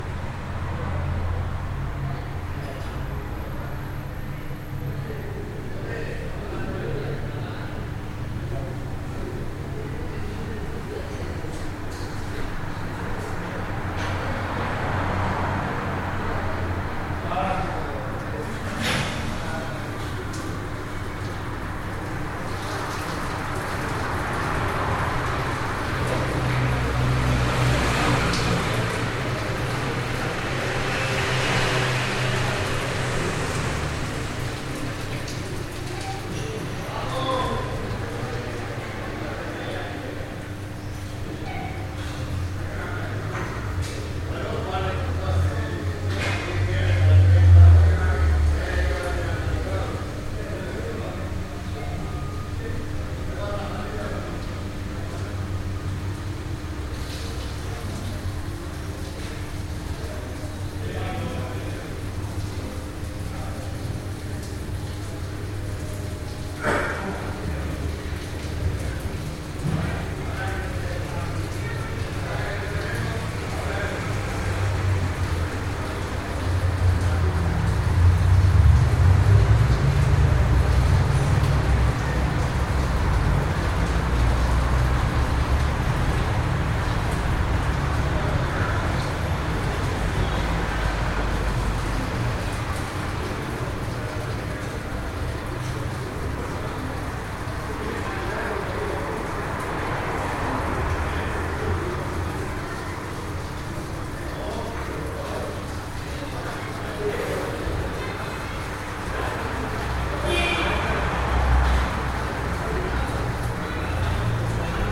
hall entrance of a building reverb ambient distant traffic in street
entrance, ambient, hall, indoors, distant, building, traffic, reverb